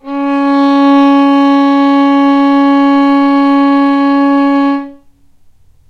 violin arco non vib C#3
violin arco non vibrato